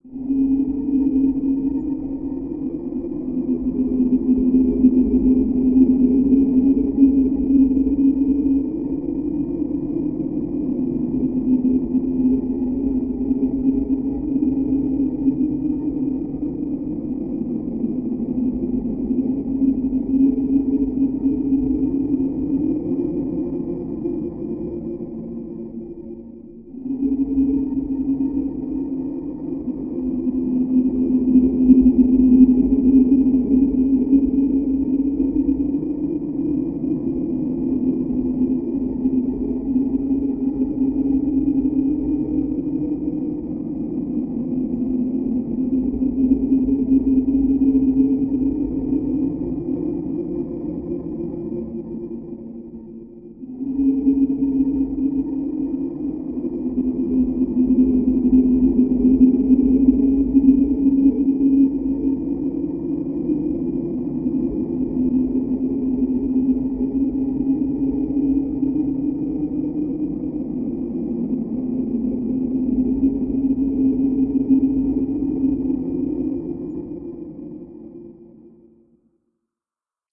Sound squeezed, stretched and granulated into abstract shapes
abstract, drone, ambient, granular, noise